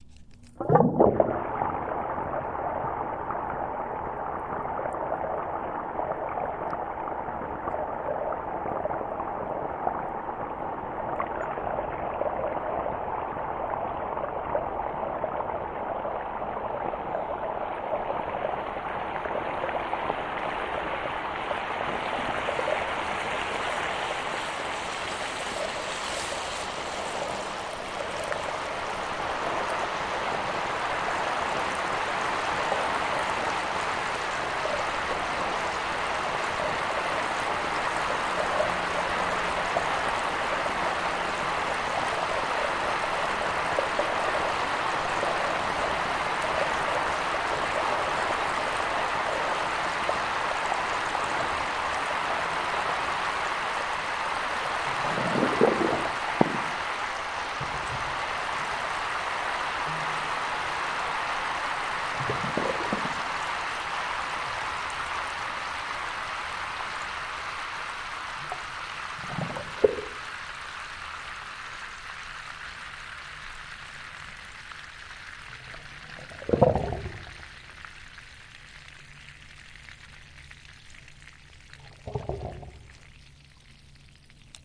Drainage Pipe Final rinse
Equipment: Tascam DR-03 on-board mics
I stuck my mics into a drainage pipe just as the washing machine began the final rinse.
drain, drain-pipe, gurgle, machine, pipe, washing, water, whoosh